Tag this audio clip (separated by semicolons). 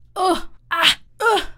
human; male; man; voice; whining